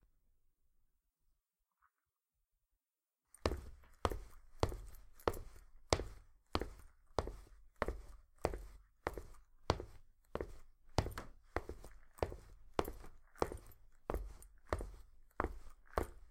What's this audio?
Walking in boots on hard surface.
step, shoes, footstep, boot, steps, boots, walking, shoe, footsteps, walk